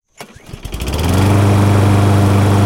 CM Lawnmower Startup 3
The sound of a lawn mower starting up.
start, grass, cutter, lawn, outdoor, motor, mower, up, landscaping, engine